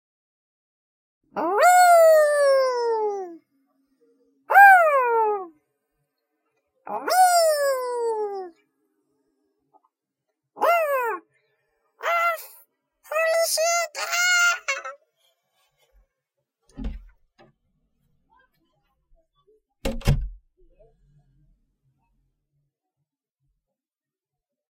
This is the sound a small cartoon cartoon character would make when being exjected, shot into space or on a fun ride.
pitched, wee, high, cartoon, we, weeeeee, excited